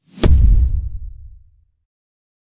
Pop down impact 1(4lrs,mltprcssng)
Normal impact sound. Enjoy it. If it does not bother you, share links to your work where this sound was used.